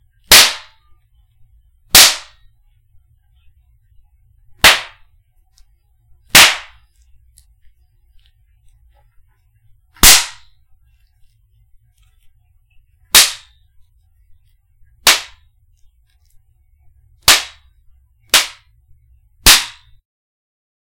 Various Belt Wipping
Used for Any harsh slapping sound or for angry intense scenes.
anger, overkill-core, loud, force, heavy, intense